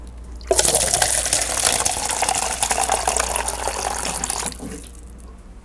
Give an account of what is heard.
office watercoolermono
Sounds of a small office recorded with Olympus DS-40 with Sony ECMDS70P. Monophonic recording of a water cooler dispensing water.
office, field-recording, cooler, water